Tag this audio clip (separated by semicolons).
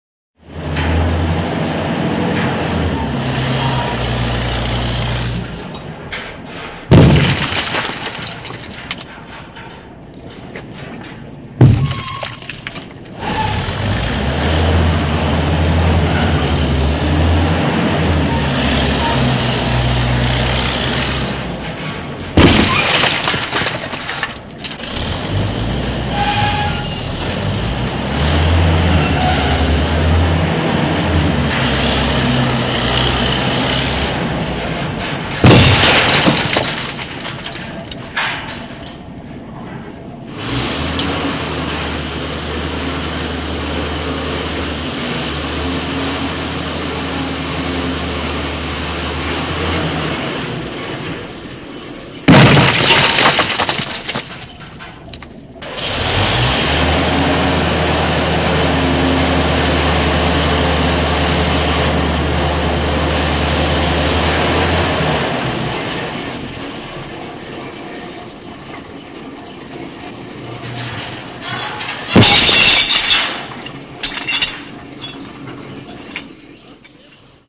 ball; demolition; excavator; wrecking